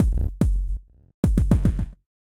flstudio random actions
experimental, glitch